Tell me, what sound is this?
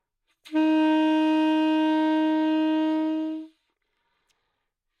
Sax Alto - D#4 - bad-attack
Part of the Good-sounds dataset of monophonic instrumental sounds.
instrument::sax_alto
note::D#
octave::4
midi note::51
good-sounds-id::4793
Intentionally played as an example of bad-attack
single-note, multisample, Dsharp4, neumann-U87, alto, sax, good-sounds